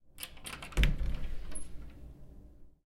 closet door open
closet, door, open